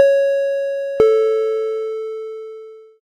A simple and short 2-tone chime.
microphone, ring